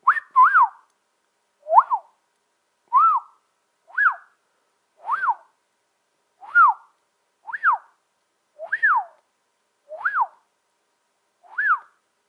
A few short whistles, appreciative.
appreciation, cheer, doodling, people, whistle